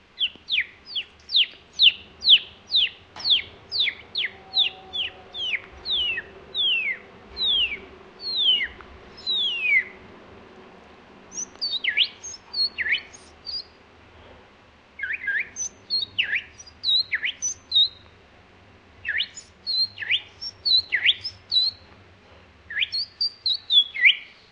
08 may 2013: about 19.00, Gorna Wilda street in Poznan (Polnad). In a flat. The young man pretends a bird.
marantz pmd661 + shure vp 88, no processing
bird, boy, fieldrecording, human, poland, poznan, voice